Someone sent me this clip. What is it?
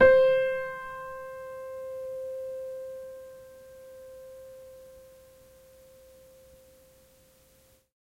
Tape Piano 5
Lo-fi tape samples at your disposal.